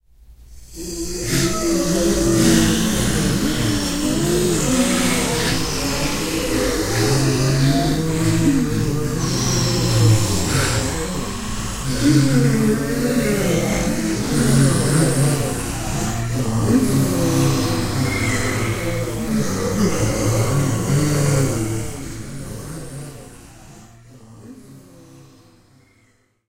zombie ambient (fallen valkiria)
An ambient of a city filled with zombies. Created for my short film Fallen Valkiria.
Ambient, creepy, Halloween, hell, Horror, living-dead, Monsters, nightmare, scary, spooky, terrifying, Terror, thrill, Zombies